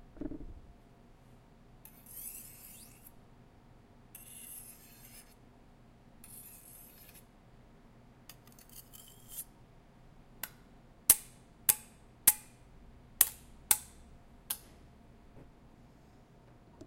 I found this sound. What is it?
Knife Sharpening
Two kitchen knives sharpening then clinking against each other.
draw, scrape, Sword, Clinking, percussion, kitchen, Sharpening, knife, hit, metal, Sharp